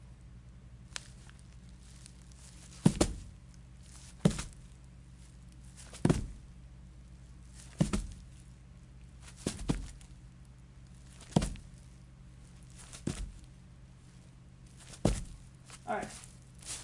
Foley recording of impacts on grass and/or leaves.